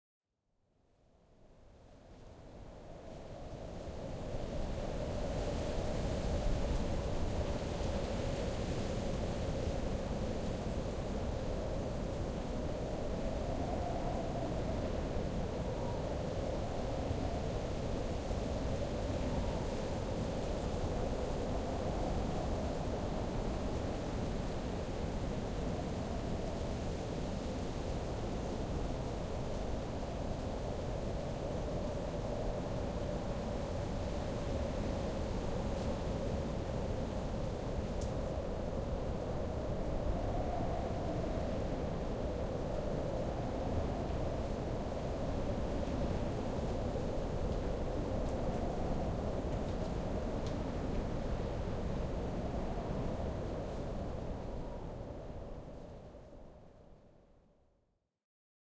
Wind Howling NIghttime
competition, dark, howling, night, recording, wind